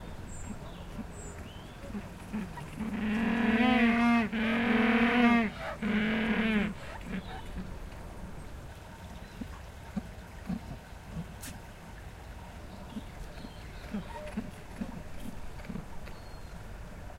Penguin Calls & Noises
Humboldt penguins making noises
Dublin Zoo 2018
humboldt, calls, zoo, noise, field-recording, bird, cries, penguin